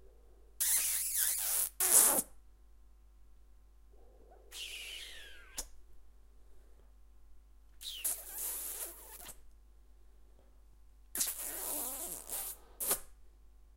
A man kissing this great platform for free sounds :*
funny, human